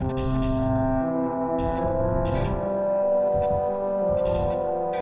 Irridesen drums 2
ambient, jeffrey